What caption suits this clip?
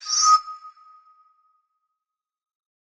UI 11 Star glass.Leaf through menu pages 1(2lrs)
Sounding commands, select, actions, alarms, confirmations, etc. Perhaps it will be useful for you. Enjoy it! Please, share links to your work where
this sound was used.
alarm, alert, application, beep, bleep, blip, button, click, command, computer, confirm, effect, fx, gadjet, game, GUI, interface, keystroke, leaf-through, menu, option, page, screen, scroll, select, sfx, signal, sound, typing, UI